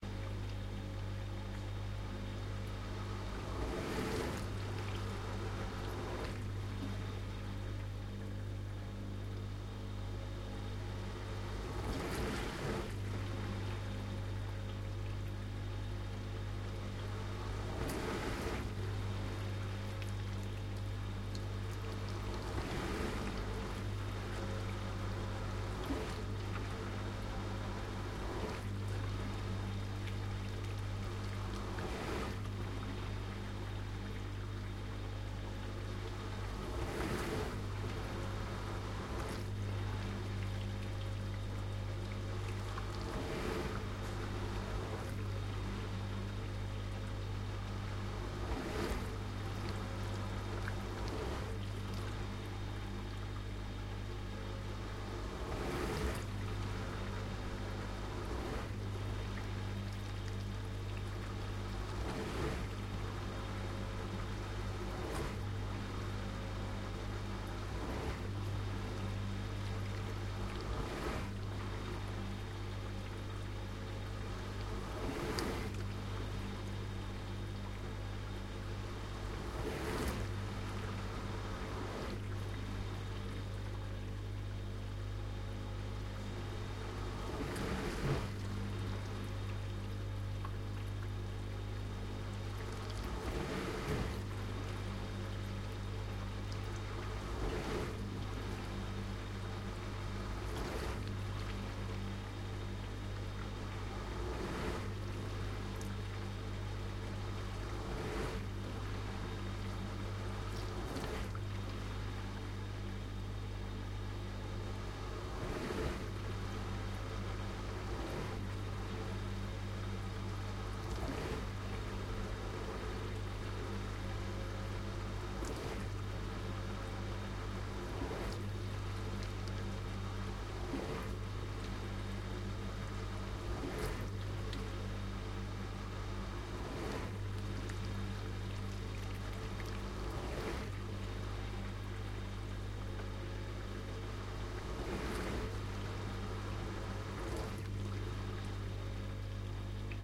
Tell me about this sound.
HOUSEHOLD-DISHWASHER-Electrolux dishwasher, close, water and mechanics 001
Medium sized Electrolux-brand diswasher washing a full load of dishes. Water movements, electric motor, moving dishes.
wash, household, appliance